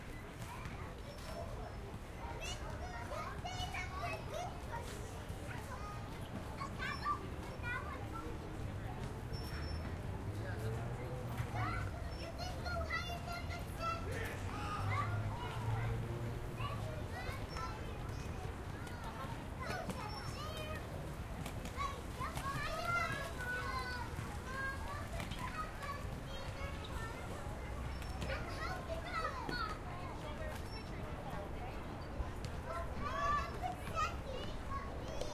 Day Kids Playing In The Park 4 Overhead
Overhead field recording of kids playing at a park during the day.
4, day, field-recording, kids, overhead, park, playing